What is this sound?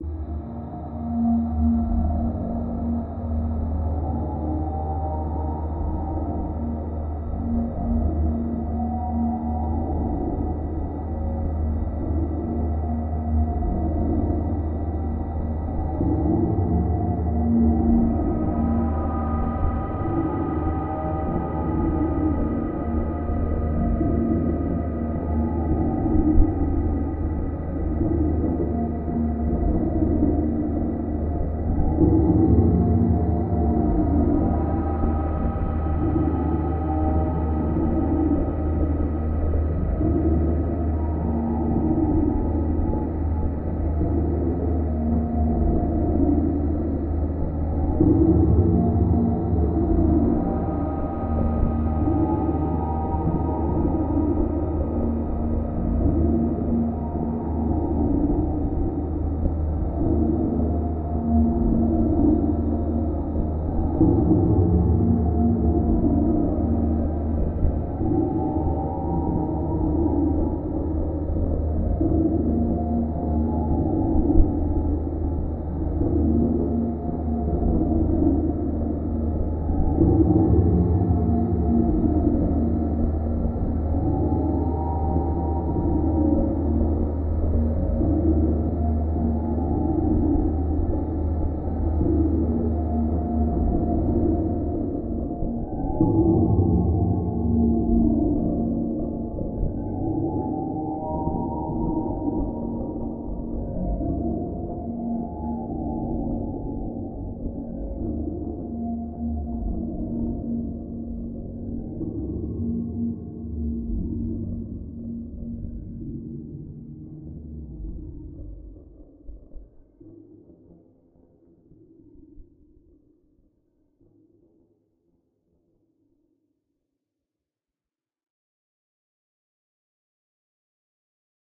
Space ambience: space monster, dark atmosphere, screaming alien monster. Hard impact sounds, mutant beast, scary dark tone. Recorded and mastered through audio software, no factory samples. Made as an experiment into sound design, here is the result. Recorded in Ireland.
Made by Michaelsoundfx. (MSFX)
alien, ambience, ambient, atmosphere, dark, deep, drone, future, impact, pad, sci-fi, sounds, soundscape, space